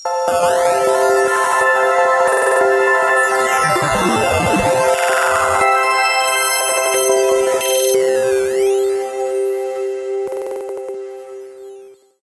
MA SFX ShiningRobot 1
100% FREE!
200 HQ SFX, and loops.
Best used for match3, platformer, runners.
sci-fi, machine, fx, sound-design, future, free-music, effect, noise, abstract, loop, freaky, soundeffect, glitch, electronic, electric, digital, game-sfx, sfx, lo-fi